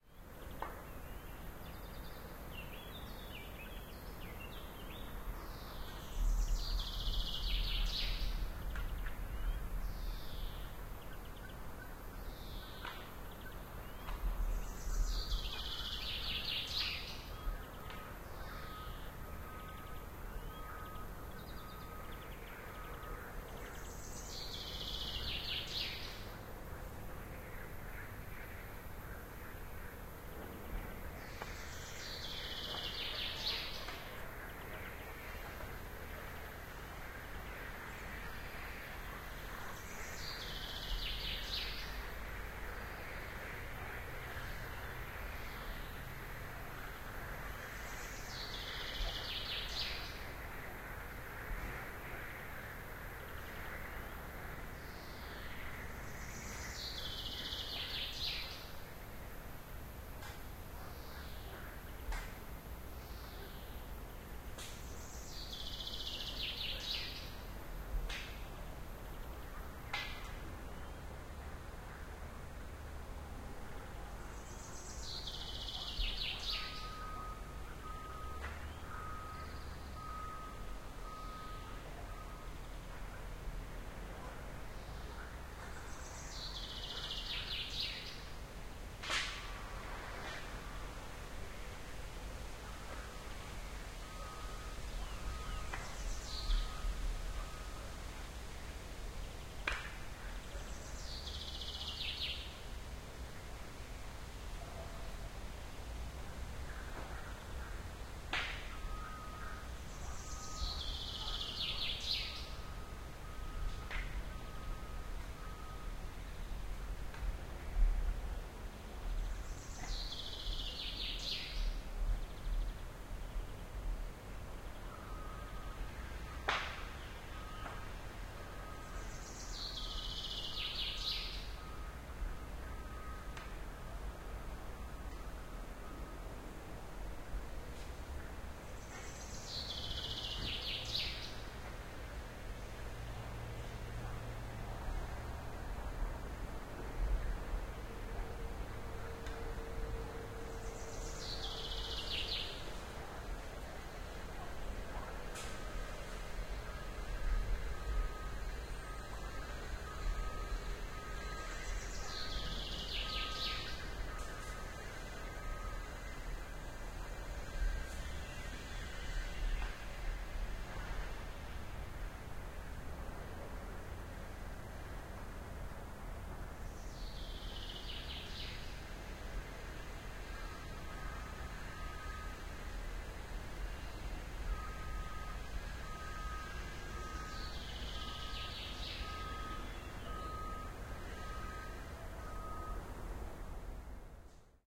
quarry forest on sabe
this is the forest 250 meters near to the quarry, you can still hear the beep of the truck on the quarry site. It' s interesting to listen to the 5 other members of the pack. They're all confined in the same geottaged area, the quarry on river Sabac near Belgrade Serbia. Recorded with Schoeps M/S mikes during the shooting of Nicolas Wagnières's movie "Tranzit". Converted to L/R
ambient, belgrade, bird, birds, dog, field-recording, forest, frogs, industry, men-at-work, nature, noise, quarry, river, sabe, soundmark, soundscape, tranzit, truck, water